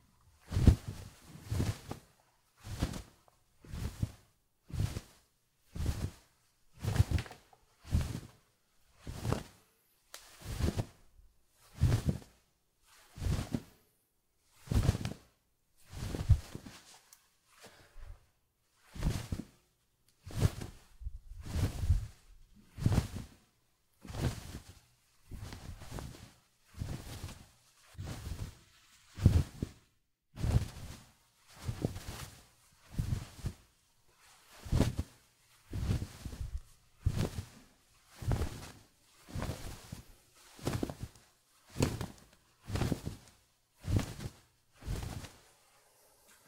CLOTH Towel Shack
This is a towel that is being flapped. Great for foley!
Clothing, Flapping, Towel, Movement